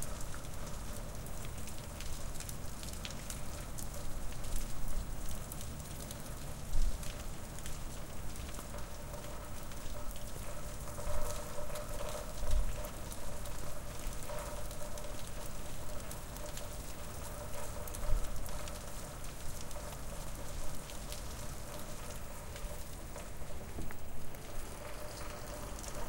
Rain Patter 01
Rain pattering outside my window ledge in Leeds.